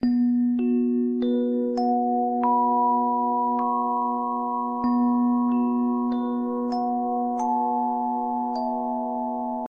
a head trip loop.
make some art.